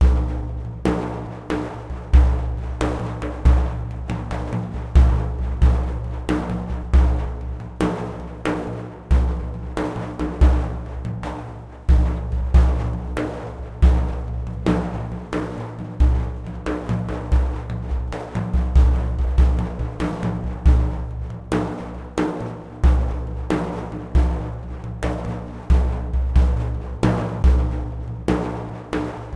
binaire lent 70
4/4 slow daf rythm with rode NT4 mic, presonus preamp